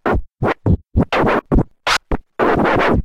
These samples made with AnalogX Scratch freeware.
scratch, synthetic, vinyl